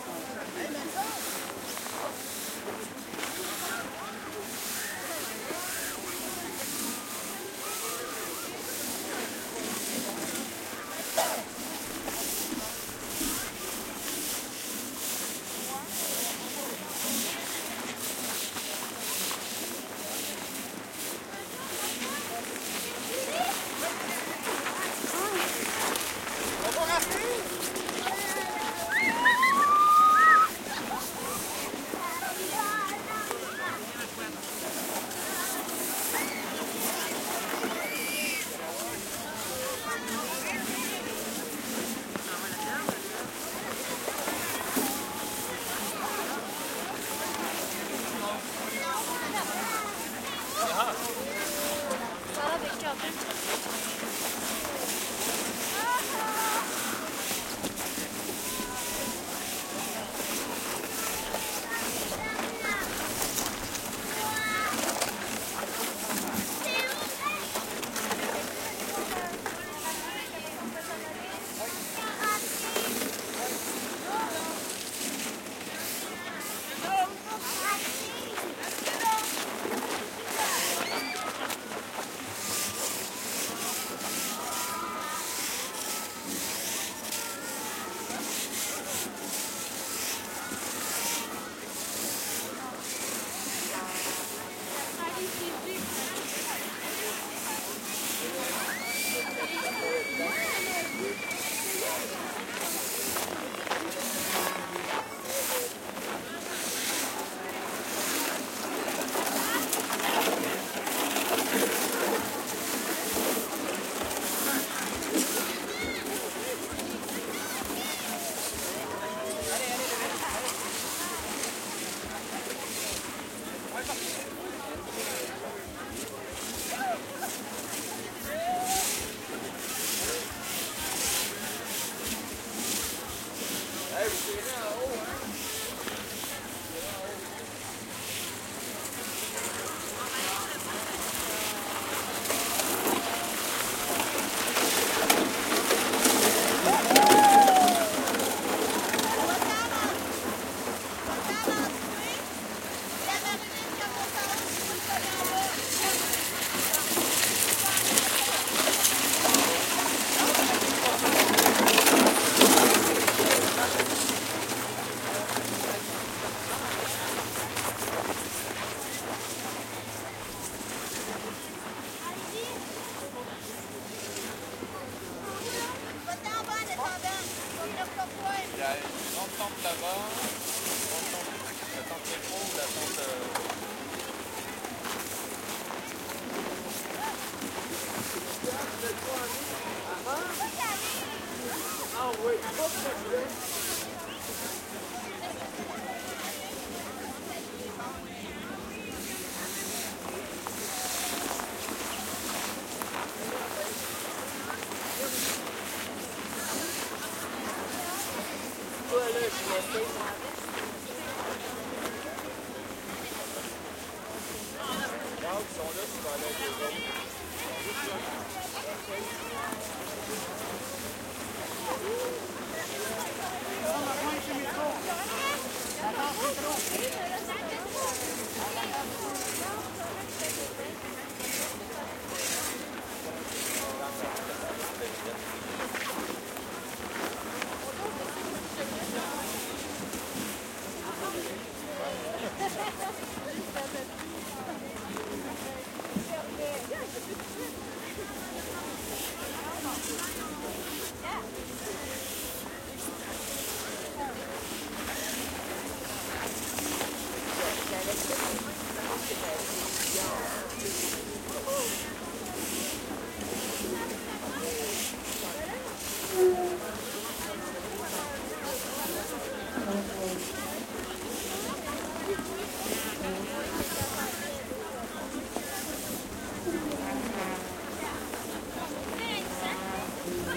crowd ext medium winter scraping steps and plastic sleds snowsuits swish quebecois voices
snowsuits, scraping, sleds, crowd, plastic, winter, voices, medium, quebecois, swish, ext, steps